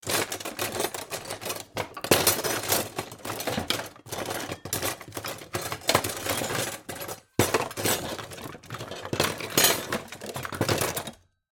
Utensils drawer - rummaging and searching.